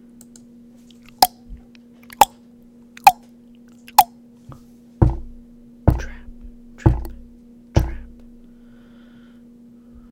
goat hooves crossing a bridge.
Goats, Stories, Three-Billy-Goats-Gruff